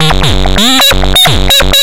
Another somewhat mangled loop made in ts404. Only minor editing in Audacity (ie. normalize, remove noise, compress).